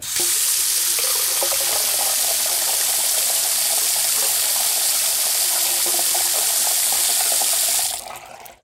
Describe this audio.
Robinet rapide
Some water from a tap recorded on DAT (Tascam DAP-1) with a Sennheiser ME66 by G de Courtivron.
tap, water